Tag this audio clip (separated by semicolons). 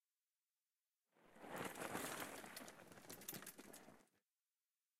approach; bicycle; bike; chain; click; downhill; freewheel; jump; park; pedaling; ride; rider; street; terrestrial; wheel; whirr